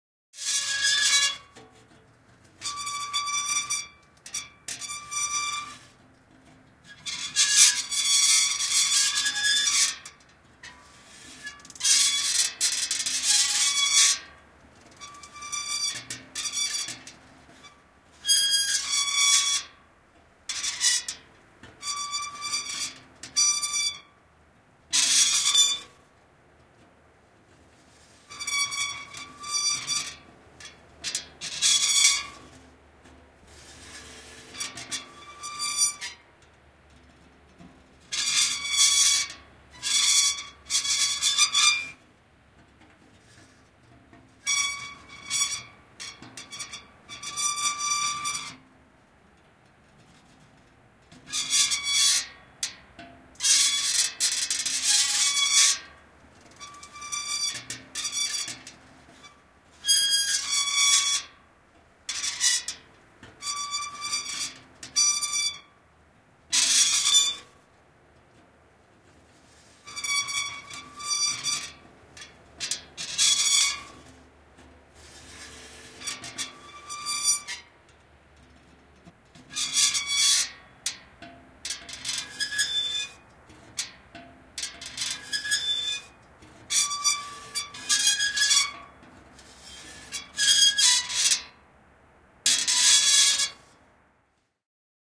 Tuuliviiri kääntyy / Metal, rusty weathervane turning, metal squeaking and creaking, a close sound
Metallinen, ruosteinen tuuliviiri kääntyilee, narisee, vinkuu. Lähiääni.
Paikka/Place: Suomi / Finland / Polvijärvi, Huhmari
Aika/Date: 20.11.1995
Creak, Creaking, Field-Recording, Finland, Finnish-Broadcasting-Company, Metal, Metalli, Narina, Narista, Soundfx, Squeak, Squeaking, Suomi, Tehosteet, Tuuliviiri, Vinkua, Weathervane, Yle, Yleisradio